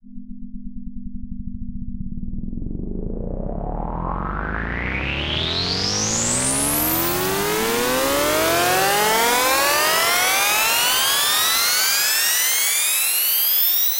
Riser Pitched 05
Riser made with Massive in Reaper. Eight bars long.